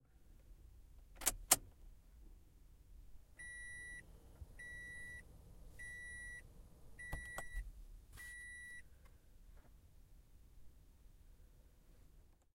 Toyota Prius C car starts with some fan noise.